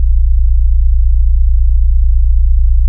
BASS (loopable)
This is a clear and deep bass i created with a synthesizer. It's loopable, too.